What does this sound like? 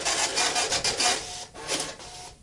drink order
Printing out a drink ticket for a pub or cafe. the printer is an epson m188b
It prints the pub/cafe name, the number of drinks, the type of drinks and the time of purchas. as well as any little note i.e. extras or amount per glass.
Perfect for a pub or a cafe or restaurant type setting. also usable for small printouts.
drink-ticket, point-of-sale, computers, drinks, cafe, pub, drink-order, restaurant, POS, nightclub, club, bar, night-club, Manchester, printer